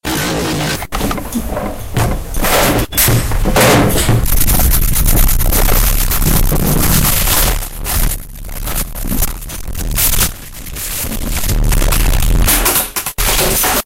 French students from